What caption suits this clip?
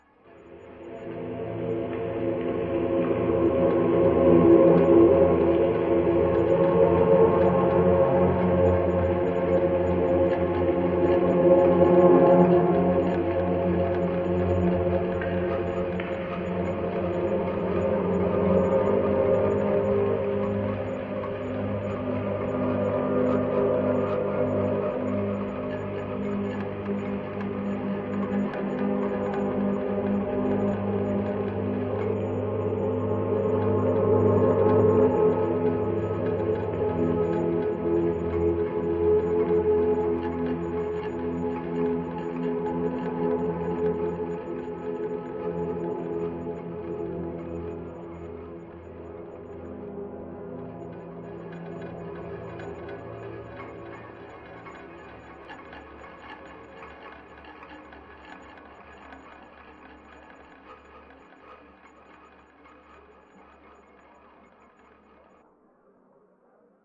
Wandering through the facade
Calming, ambient track.
ambient, calming, music, sci-fi